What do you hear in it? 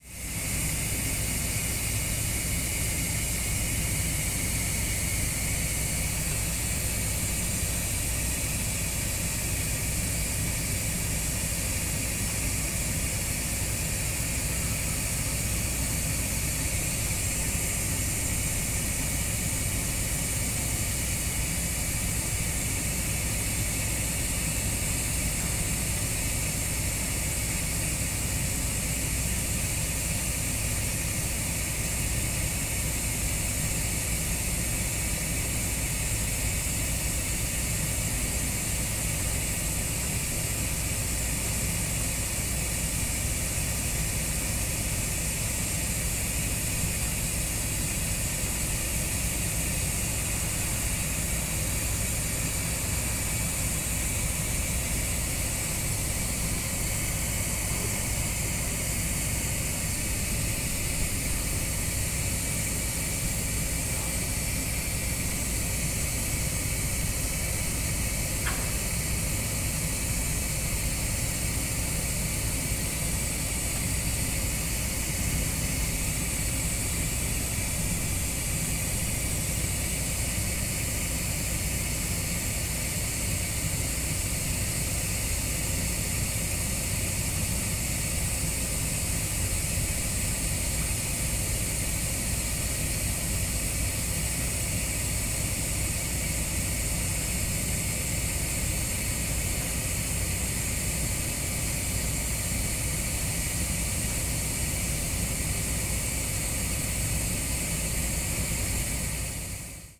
02.07.2012: about 8 a.m. Hamburg, Pinkertweg St. sound of hydralic system in a truck. Hiss sound.